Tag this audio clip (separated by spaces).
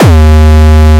gabba kick distortion